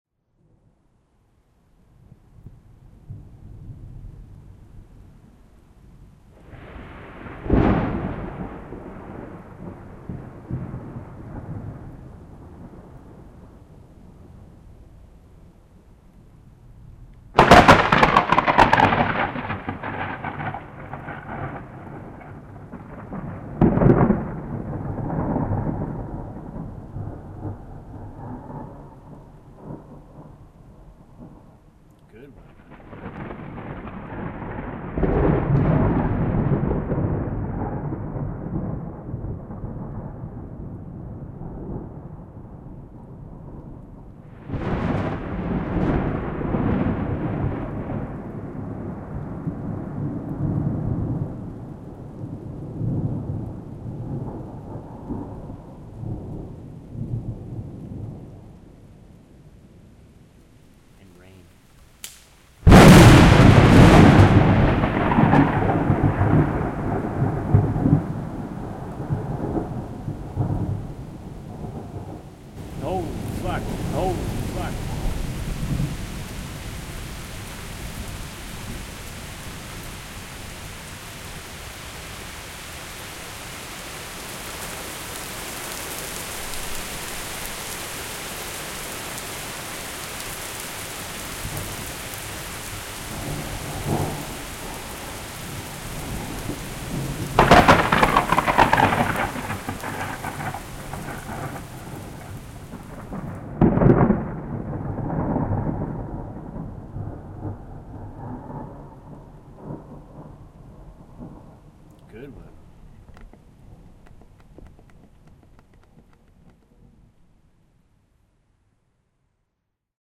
20160713 thunder storm
Wait for it, at 17 seconds there's a doozy of a clap. Stay for the rain.